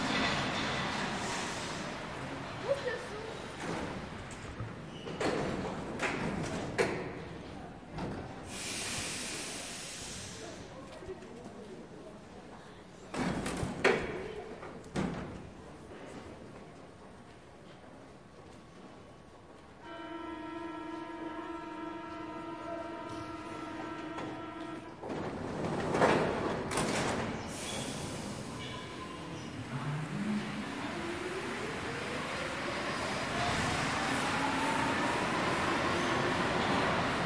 Sonicsnaps-OM-FR-e-metro
The metro train arrives.